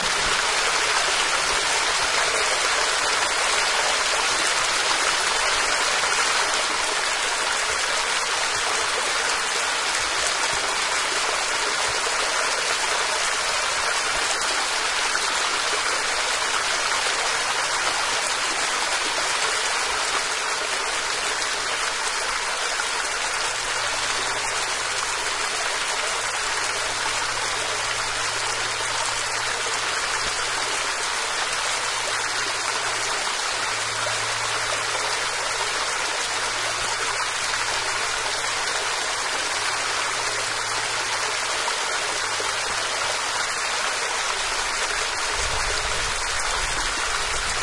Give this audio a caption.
lost maples waterfall
water, hill-country, forest, gushing, texas, waterfall